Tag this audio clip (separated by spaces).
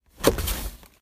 opening open closing compartment package wooden wood card-board close box cardboard door carton drop